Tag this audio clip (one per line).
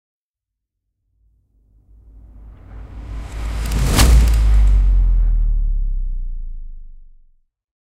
bass
door
drop
FX
reverse
SFX
slamming
wooden